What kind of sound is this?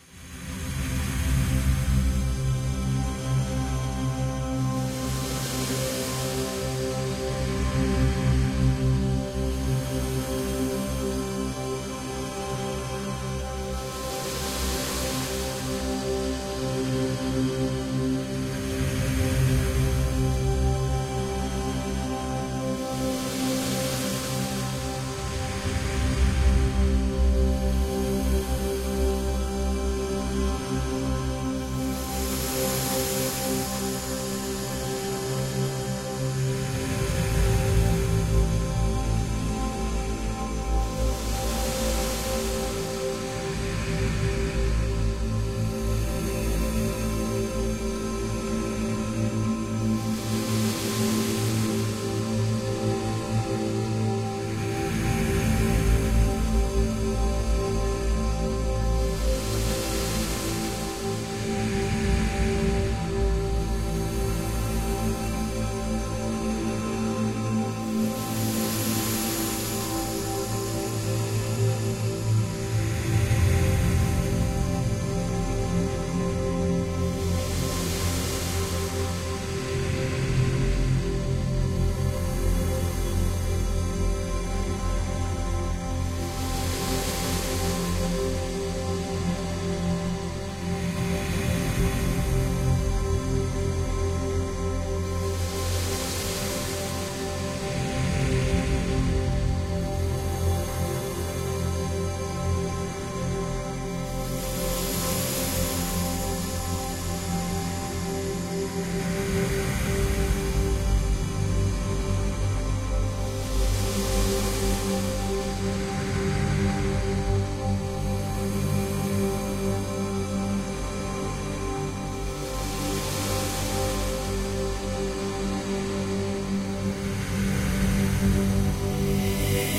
ambient-break
My first experimental sound, it's meant to be the start of an intro track I can use for my public sets.
atmosphere, background-sound, soundscape, ambiance, ambient